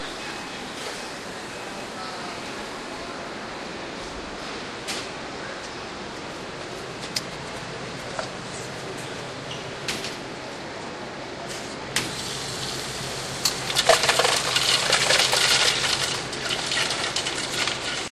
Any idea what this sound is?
washington airspace mcdonalds
Inside the McDonald's with the $5 burger at the food court in the National Air and Space Museum on the National Mall in Washington DC recorded with DS-40 and edited in Wavosaur.
vacation, washington-dc